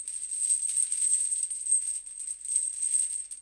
rattling metal chain
metal, rattling